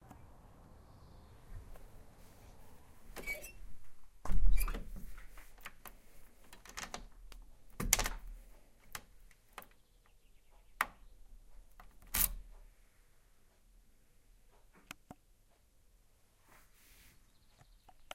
Closing and locking a door.
key, lock, locking, sound